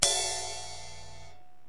crash 3 ting cut
This was hit by my plastic tip stick on a 14" tama cut off
recording, e, live, cymbal, loop, drums, crash, drum, funk, rock